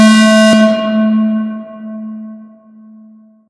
Error sound in a large room
error, buzzer, fail, hall